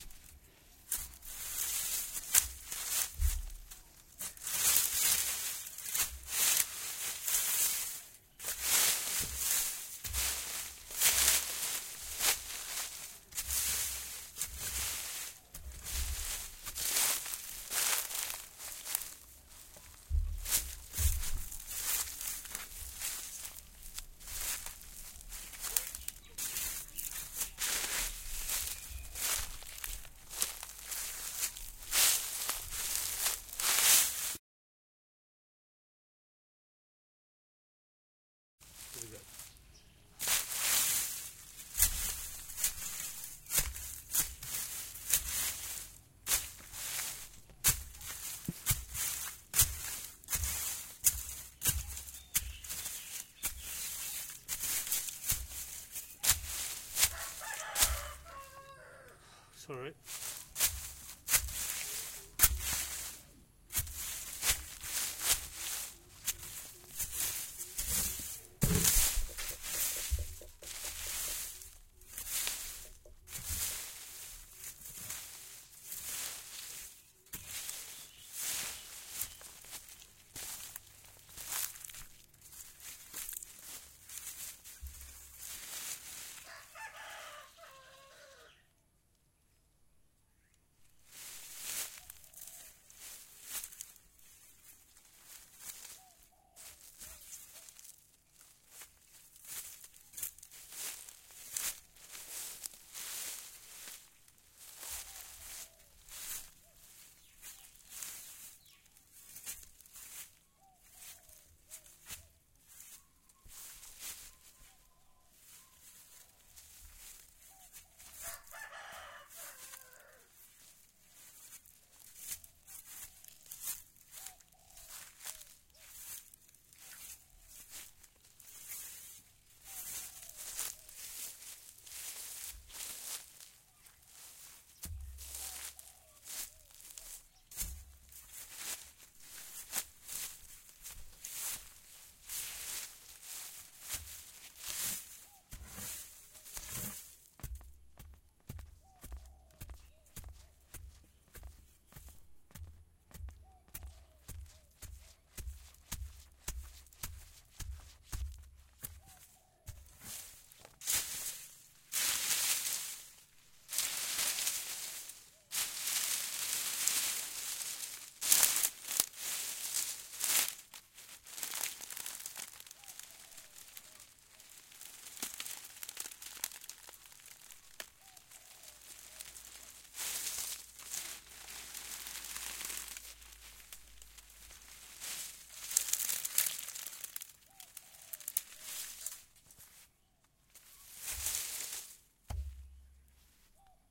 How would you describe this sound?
Walking through some bushes
branches, rustle, bush, bushes, shrubbery